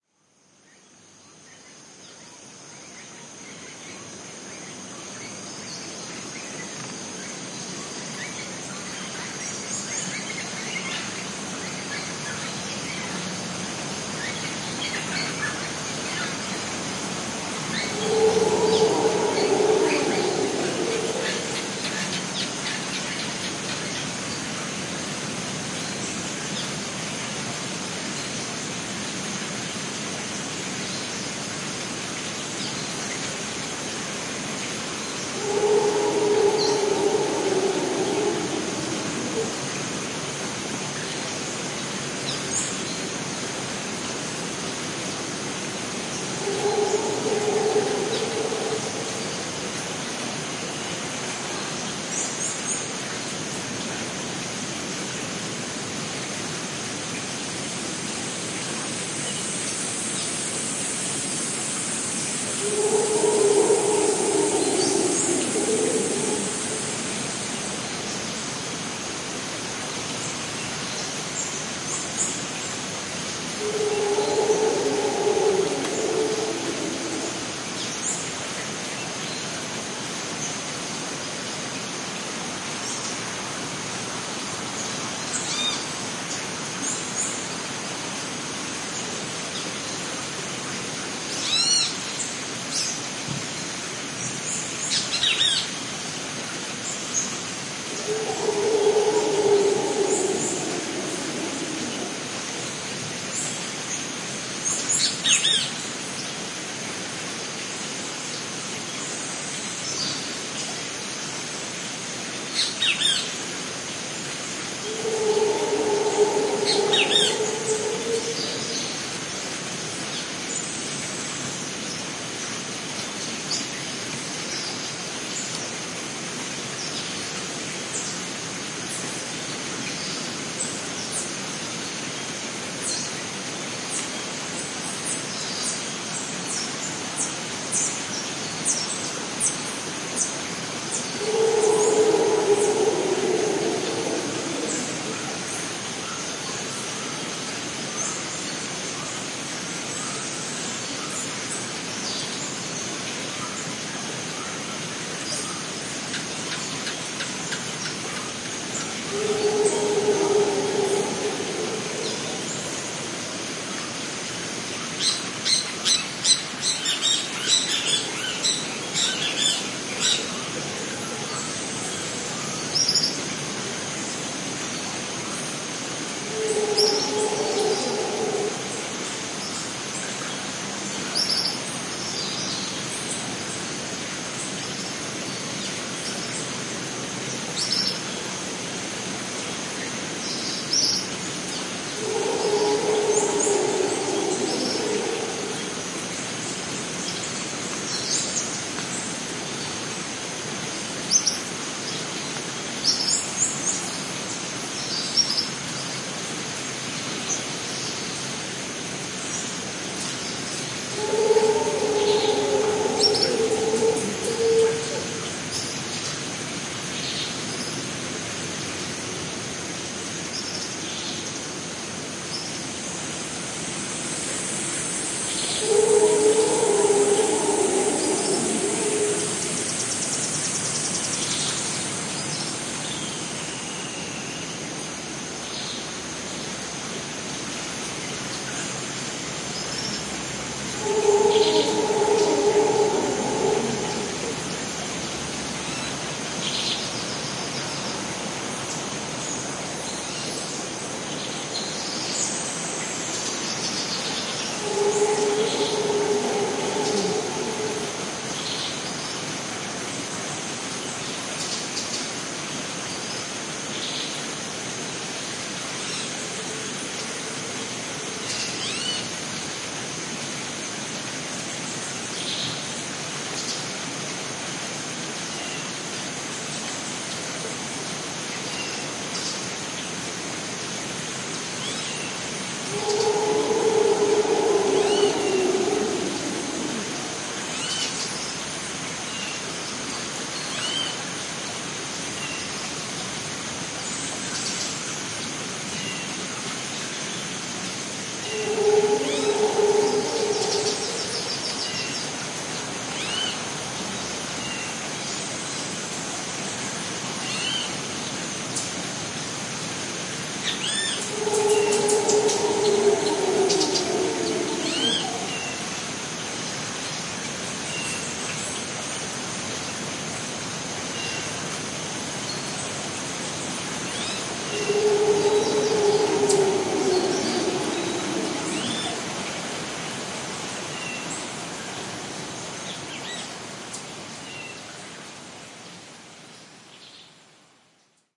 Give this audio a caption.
Costa Rica Rainforest
Lowland Rainforest sounds (Howler Monkey, birds, and insects), Pacific coast, Costa Rica
ambient, insect, canopy, rainforest, biosphere, nature-sounds, tropical, Costa-Rica, insects, trees, bugs, howler, field-recording, Howler-Monkey, nature, birds, peaceful, primate, pura-vida, bird, forest, jungle